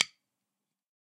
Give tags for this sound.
2BN; 5A; clicks; DC; drum; drumsticks; hickory; Lutner; March; Mark; metronome; nylon; Pro; ProMark; sticks; tips; wood

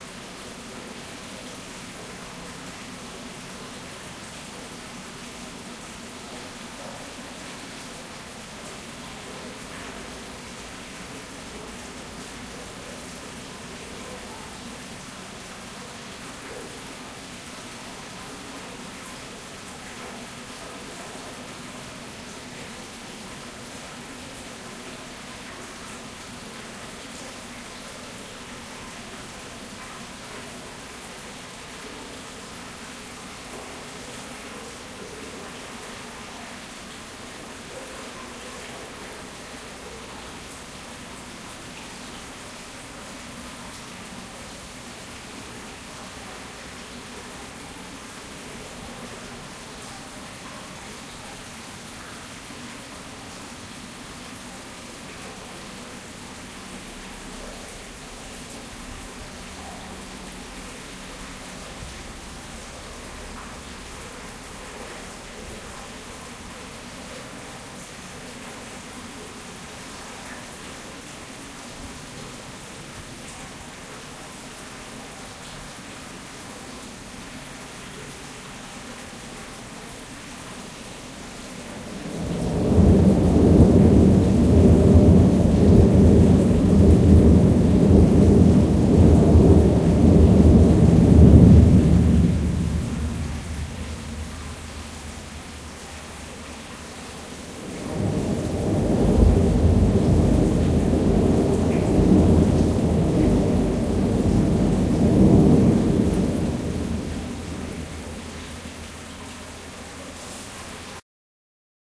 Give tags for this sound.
river; night; bridge; Moscow; subway

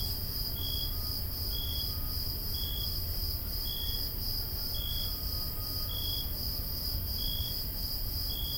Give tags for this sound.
evening; sera

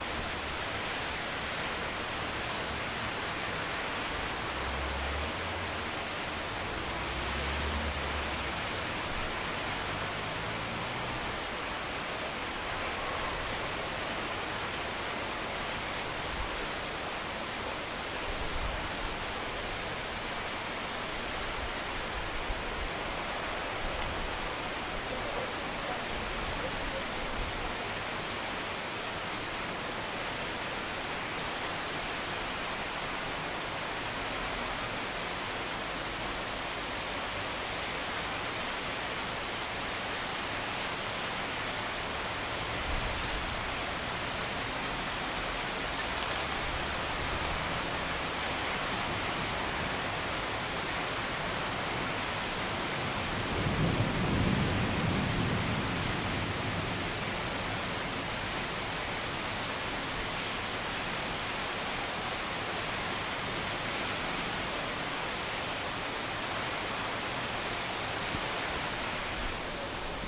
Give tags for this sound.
field-recording; rain; shower; storm

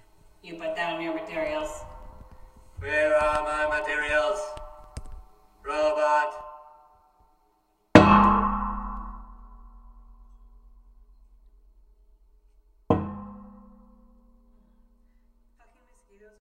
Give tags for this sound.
bang; clang; contact-mic; metal; metallic; piezo; robot; speaking; speech; talking; voice

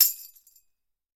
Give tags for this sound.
chime; chimes; drum; drums; hand; orchestral; percussion; percussive; rhythm; sticks; tambour; Tambourine

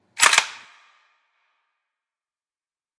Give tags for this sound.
AK; Gun; Gun-FX

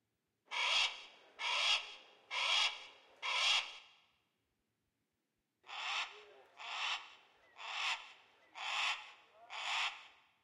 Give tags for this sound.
Exterior; Crow; Cry; Bird; Call; Hawk

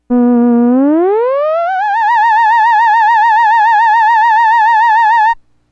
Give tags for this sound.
scifi-sound-2; theremin; variation-1

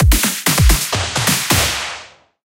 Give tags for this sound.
Drums; claps; Loop

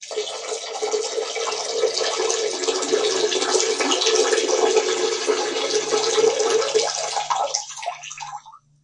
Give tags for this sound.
water; flushing; washroom; plumbing; flush; drip; poop; drain; toilet; pee; restroom; bathroom; squirt

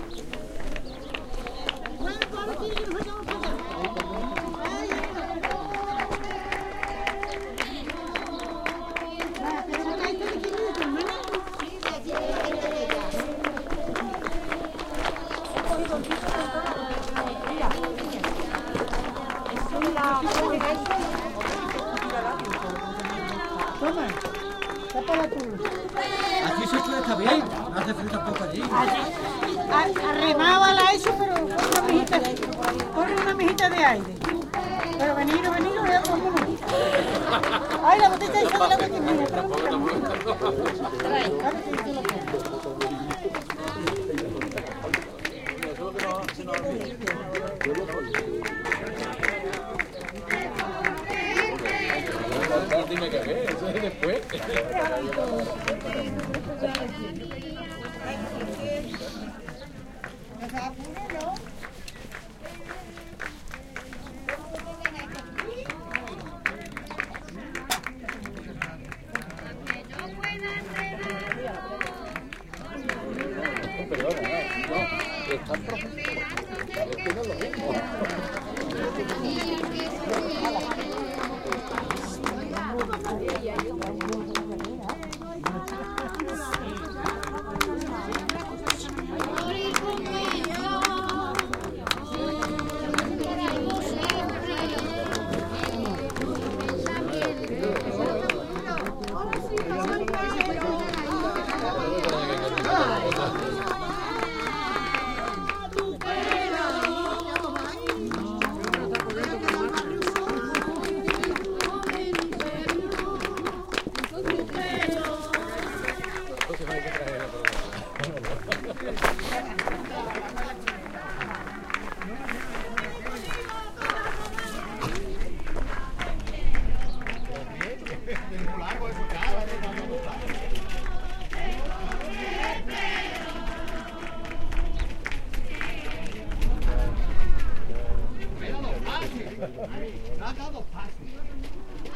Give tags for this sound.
voice; spring; gathering; field-recording; spanish; singing; traditional; music

